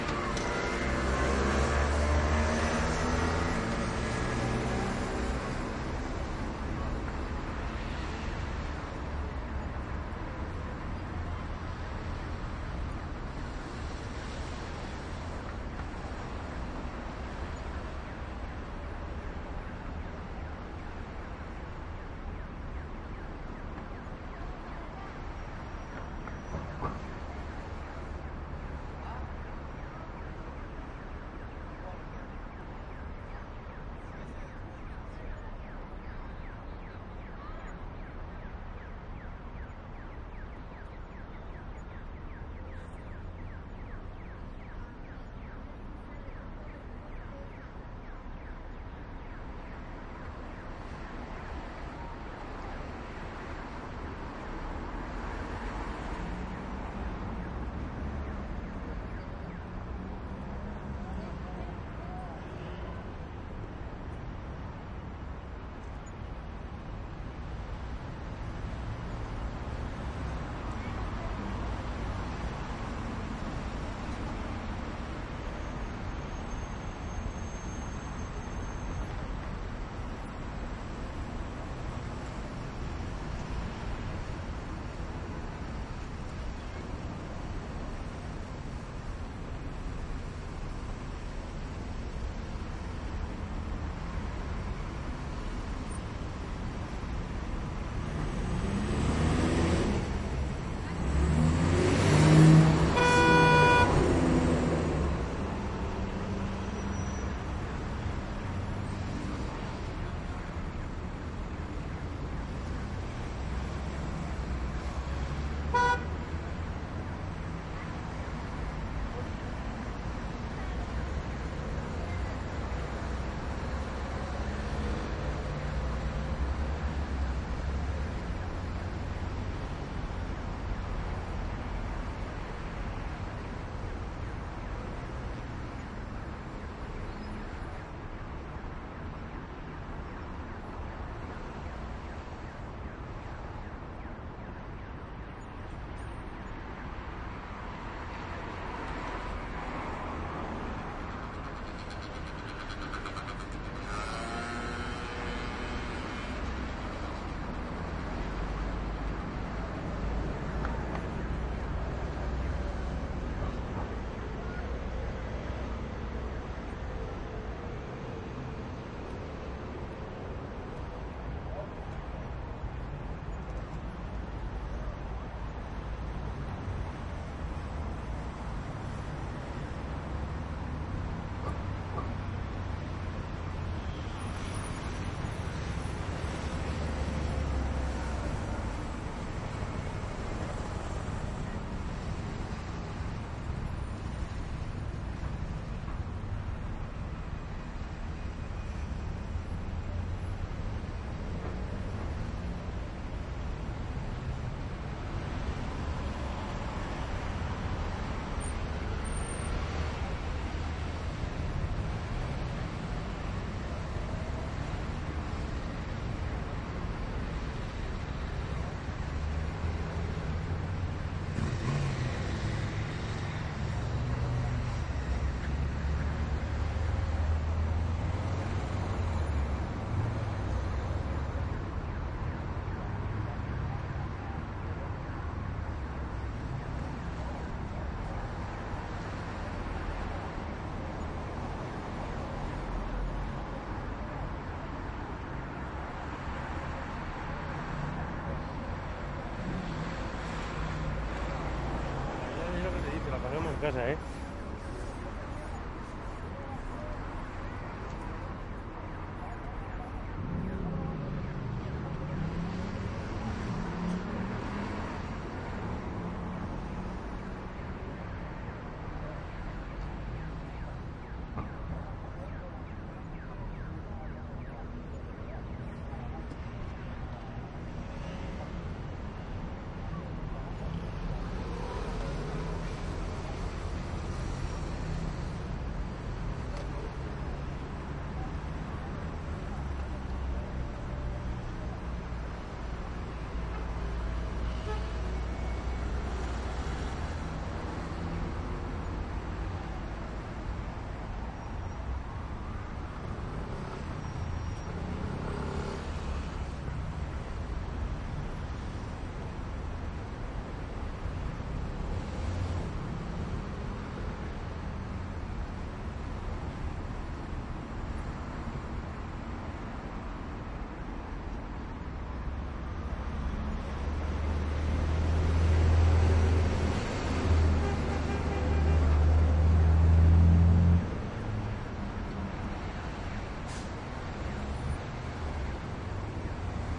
Madrid Kio Towers L
Madrid Plaza Castilla recording, in the left Kio Tower. Medium traffic, close to the square, medium velocity cars, horn, siren, motorcycle, brakes, quiet pedestrians.
Recorded with a Soundfield ST450 in a Sound Devices 744T